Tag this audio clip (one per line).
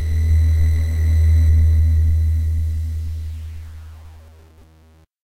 synthesis
sack
free
larry
sound
sac
hackey
sine
hacky
sample